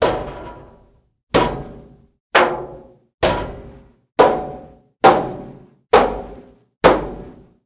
Hitting a metal vent with various objects in various places.
impact, metal, vent